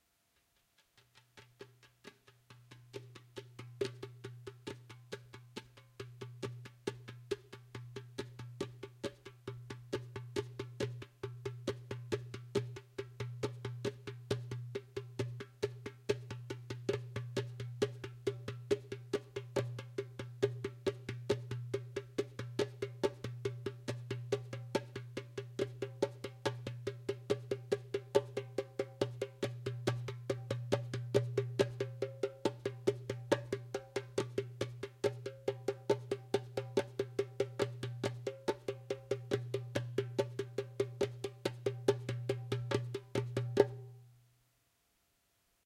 darbuka accellerating
An accellerating rhythm on the darbouka. Maybe useful as a filler or background. Recorded with Zoom H2n and Sennheiser mic. No editing, no effects added.
The money will help to maintain the website: